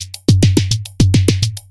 Rhythmmaker Randomized 140 bpm loop -48
A pure electro loop consisting of kick and hihats plus snare. This loop is part of the "Rhythmmaker Randomized 140 bpm
loops pack" sample pack. They were all created with the Rhythmmaker
ensemble, part of the Electronic Instruments Vol. 1, within Reaktor. Tempo is 140 bpm
and duration 1 bar in 4/4. The measure division is sometimes different
from the the straight four on the floor and quite experimental.
Exported as a loop within Cubase SX and mastering done within Wavelab using several plugins (EQ, Stereo Enhancer, multiband compressor, limiter).